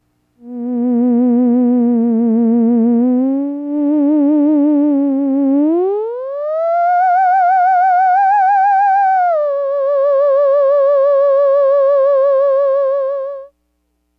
scifi ether a
Mono. Dry. Ethereal melodic phrase, ominous, atmospheric . Recorded dry so you can add the effects you wish.
ethereal-atmosphere-1, theremin, variation-1